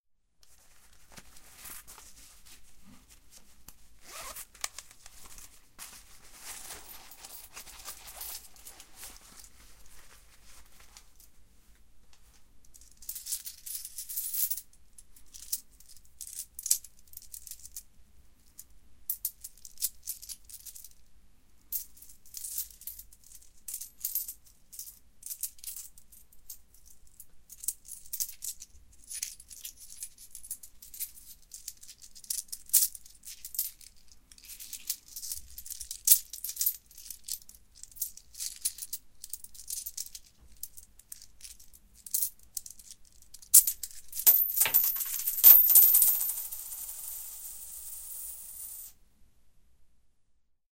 After playing a while with some coins they fall spreading on the floor.
money, euros, cash, coins